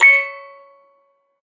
metal cracktoy crank-toy toy childs-toy musicbox